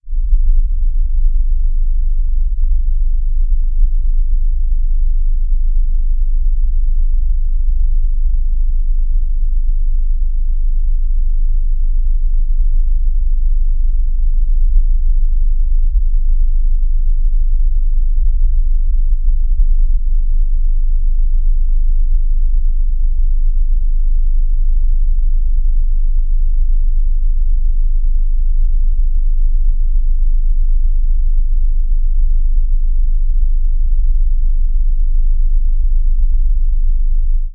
Steel mill low frequency drone
This is a recording of very low frequency hum of a steel mill. The sample has been low pass filtered. The main frequency is about at 25hz. The sound was recorded some distance away of the steel mill area, where it mixed with other sounds as a constant hum, which could be felt in body too at certain spots.
bass, drone, hum, industrial, low, low-frequency, machine, machinery, mechanical, noise